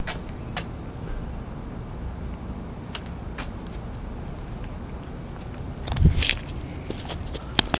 raw notsure
Raw sound, not sure if it's baby heartbeat or what.